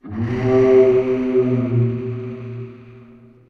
Created entirely in cool edit in response to friendly dragon post using my voice a cat and some processing.
animal, cat, dragon, processed, voice